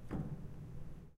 Ambient 09 Woody-16bit
piano, ambience, pedal, hammer, keys, pedal-press, bench, piano-bench, noise, background, creaks, stereo
bench, hammer, pedal-press, piano, pedal, ambience, background, keys, noise, stereo, piano-bench, creaks